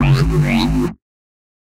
G bouge bien
This is in G
Bass, G, Wobble